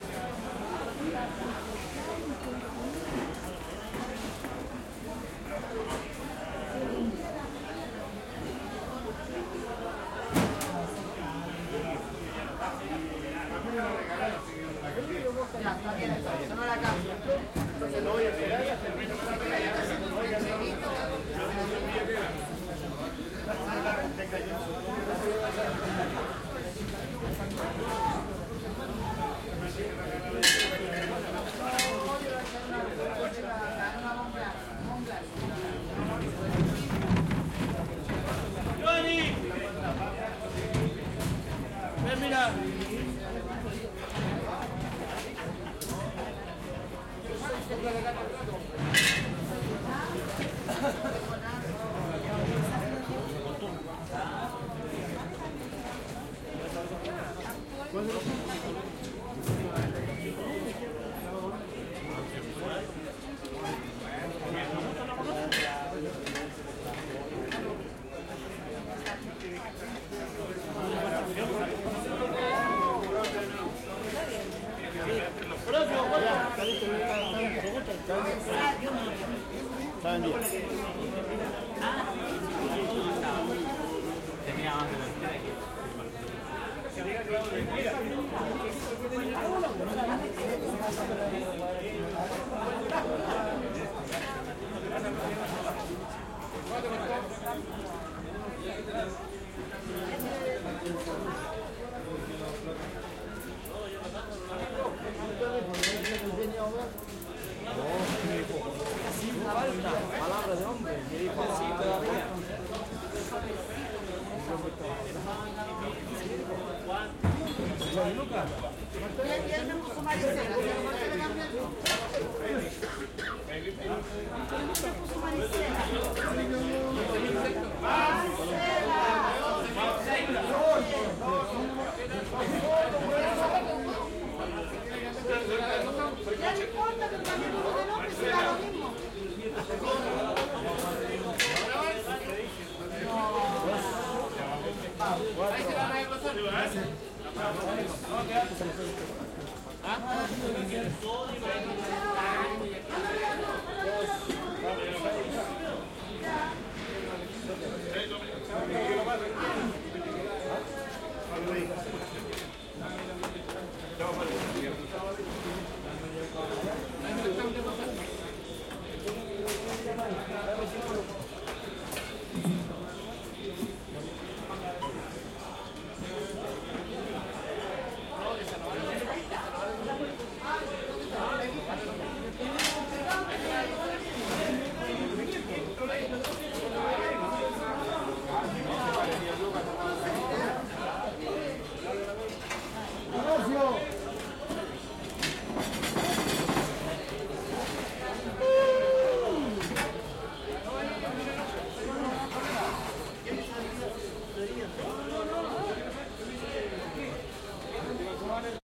mercado central 05 - picada restaurant
Mercado Central, Santiago de Chile, 11 de Agosto 2011. "Picada".
central, chile, food, market, mercado, restaurant, santiago, sea